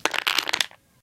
wood impact 01
A series of sounds made by dropping small pieces of wood.
hit, wooden, wood, drop, block, impact, crash